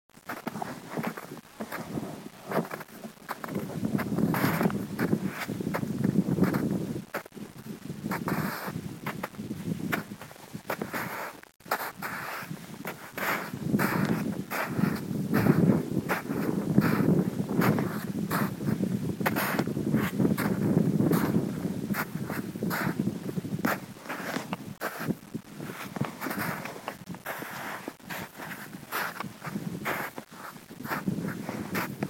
snow steps 2
walking in the snow, some bg sound some mic taps
footsteps, snow, frost, walking